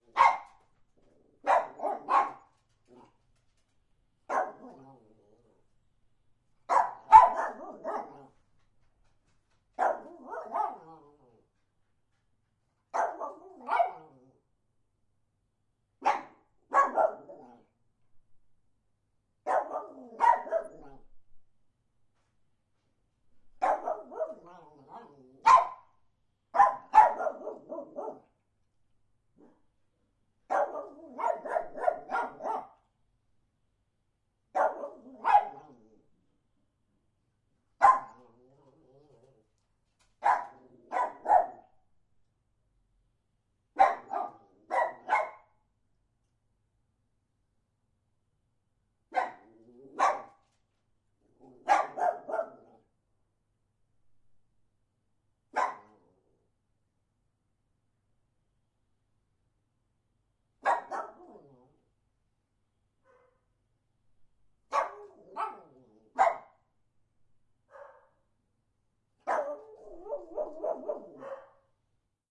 Our dog Pixel barking when he saw another dog through the window.